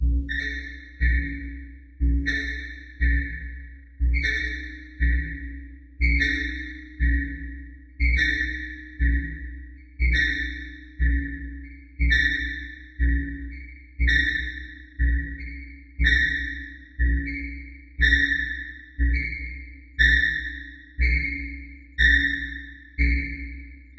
Slowly pulsating thuds are accompanied by an arhythmic metallic squeaking. Simulation of a slight defective machine without any background noise. A loop made with synthesizer.